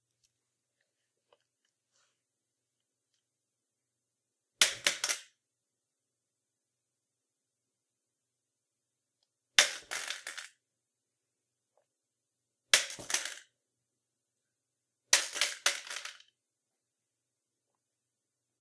dropping crutches on tile
The sound of a crutch being dropped on a tile floor
clack, crutch, drop, tile